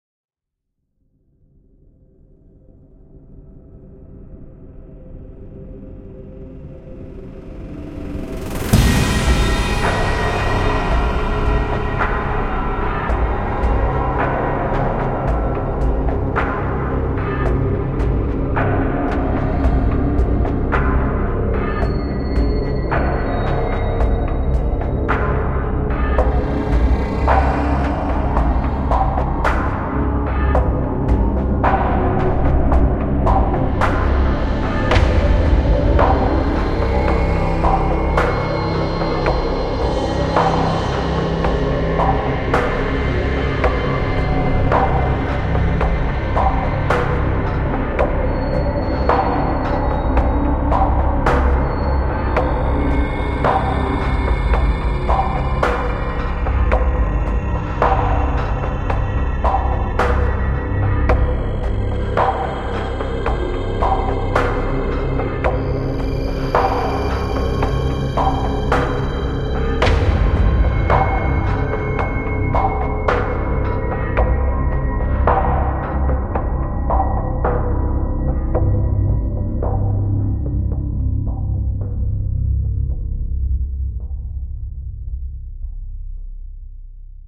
Horror Movie Cue
A sound cue I designed in FL Studio using Omnisphere 2 and 3rd-party VSTs. Best used during a suspenseful/dramatic moment in a movie or video game.